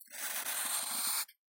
dragging a sharpie along a piece of paper